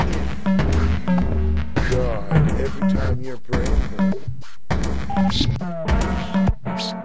i downloaded and threw together the following samples:
as-well as, my voice, and some beat.
"what if you died everytime your brane gru?"
sorry to "drogue"? i had trouble finding you on here to thank you for your sample.
..and i forgot to put this in the remix tree, if anyone knows how to help me.
brain, remix, loop